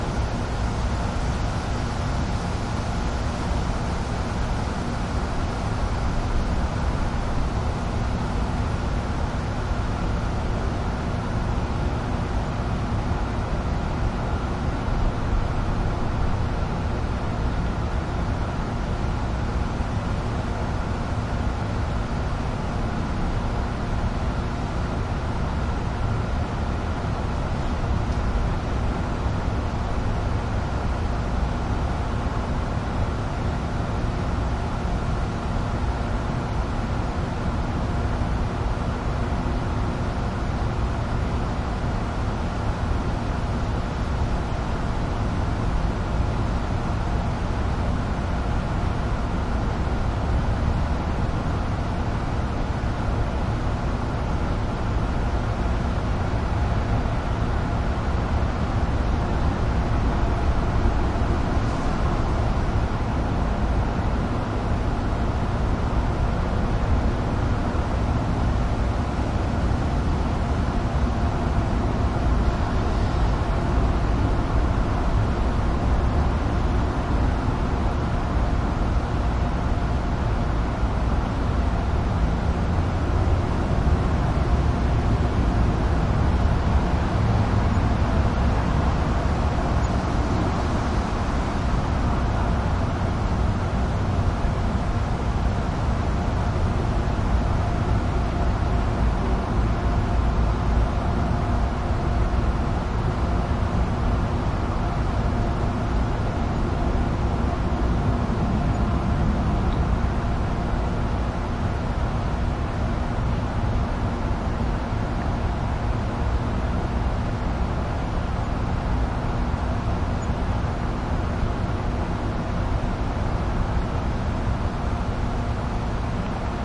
skyline urban night air tone heavy balcony 3rd floor light distant passing cars and ventilation Verdun, Montreal, Canada
air, balcony, Canada, cars, distant, heavy, light, Montreal, night, passing, skyline, tone, urban, ventilation, Verdun